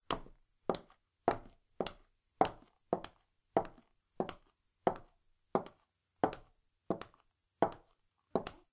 Womens Shoes (3)
Slowly walking female high heeled shoes. Might be useful to split up for foley sounds or animation.